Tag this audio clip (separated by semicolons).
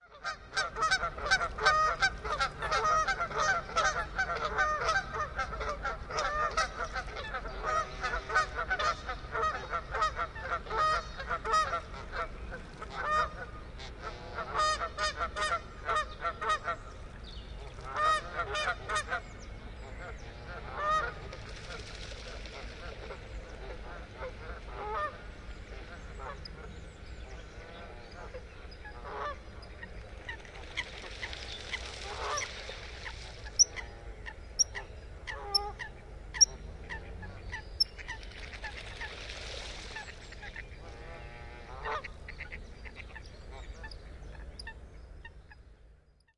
Branta-canadensis; Canada-Goose; geese; Goose